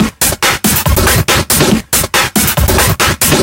Electro Drums mixed up with some flange wave.